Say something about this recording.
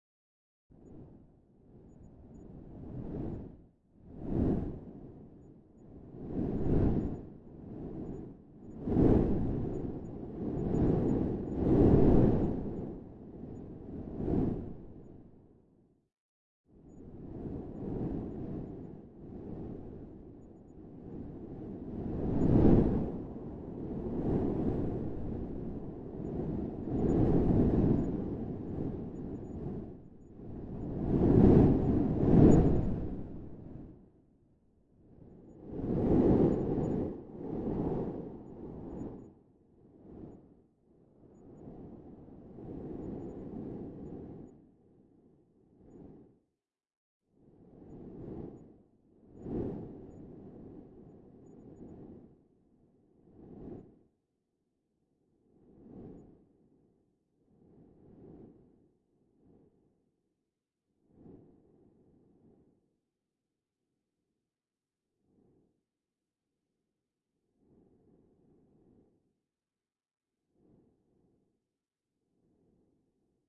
Low Wind Gusts- Processed Hightened
Trialing the GRM tools 'Space Grain'
spacegrain, tremolo, grain, grm, windy, space, wind, autopan